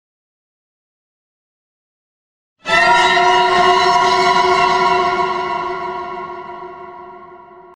Ghost Scare Vintage
CONJURING
HORROR FX SPOOKY CREEPY AMBIENCE
Just send me a link of your work would love to see them :)
Horror, Effect, FX, Creepy, Sound, Scare, Fantasy, Spooky, Piano, Scary, Jump, Eerie, Ambience, Ghost, Atmosphere